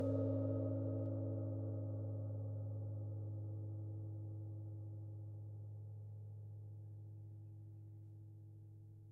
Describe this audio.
A soft gong strike
Gong-strike, Sample, Soft